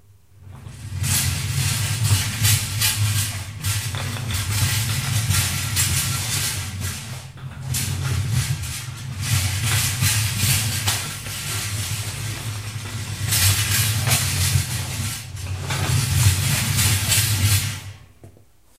recording of a shopping cart/wagon(?)